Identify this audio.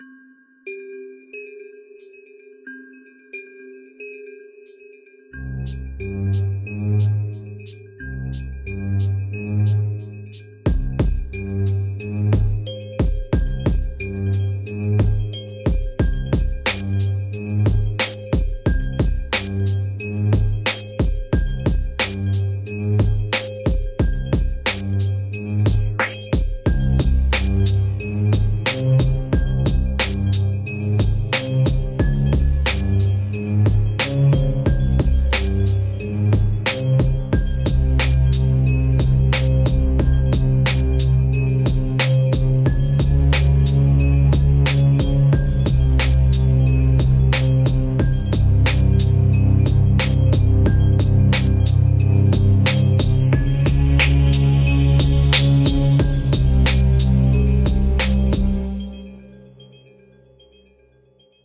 67 Dream Keys, longer version this time in a wave file.
Made in FL Studio with stock sounds.
Produced and written by: R3K4CE & NolyaW
(M.M.)